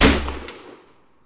The sound of a mechanical self-made crossbow construction giving off a heavy shot.
Edited with Audacity.